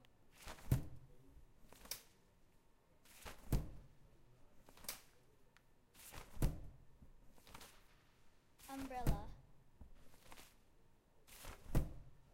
sonicsnaps GemsEtoy davidumbrella
Etoy, sonicsnaps, TCR